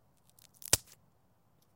Stick Snap 2
Simple stick breaking in half